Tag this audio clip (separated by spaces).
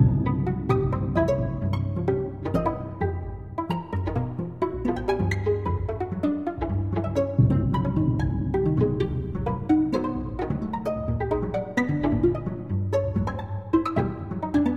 creepy orchestra plucked tension